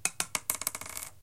basically, this is the recording of a little stone falling on the floor, faster or slower, depending on the recording.
rock, stone